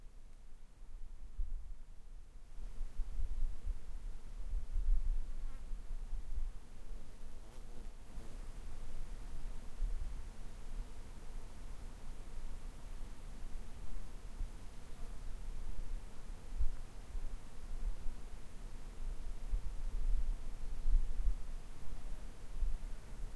greece naxos white noise 2
General ambient sound at the top of an hill near Ano Potamia in Naxos island.
white, naxos, noise, birds, greece, ano-potamia